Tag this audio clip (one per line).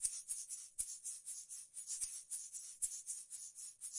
Eggshaker
shaker
percussion